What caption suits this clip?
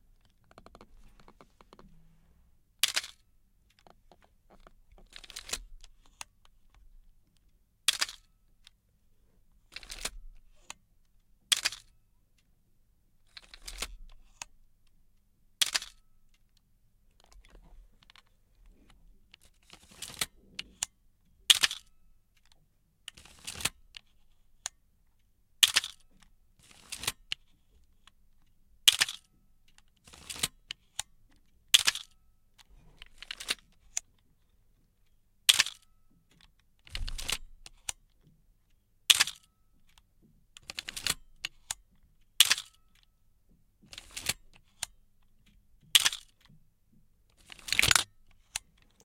SLR Canon AE-1
A Canon AE-1. Shutter release at a 15th and 30th of a second. Film advance.
shutter, mechanic, canon, release